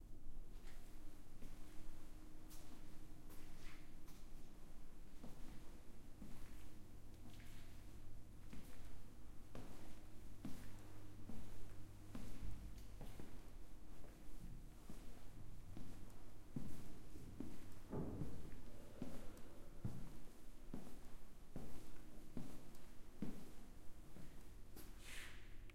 silent steps in a large hall
Gentle moving person in a large hall
large, hall, steps